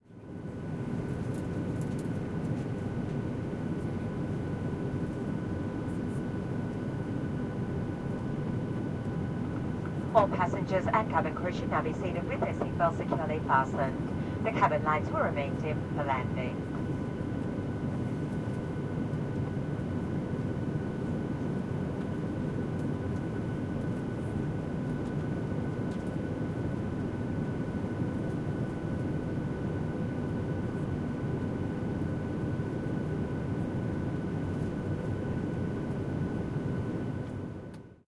Inflight Landing Warning
Inflight ambiance cabin noise. Hostess warns that the plane is about to land.Recording - Edirol R-09 internal mics. "All passengers and crew should be seated with their seatbelts securely fastened. The cabin lights will remain dimmed for landing..."